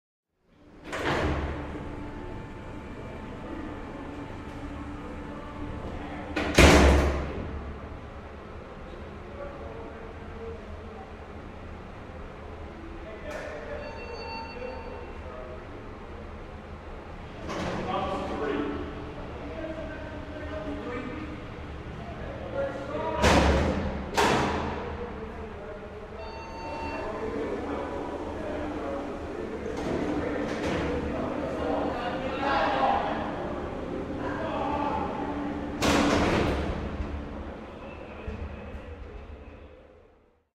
Dust ambiance prison
Inside a prison
ambiance, atmosphere, jail, prison